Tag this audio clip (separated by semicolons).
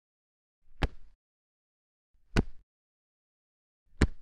Punch; sound-effect; hit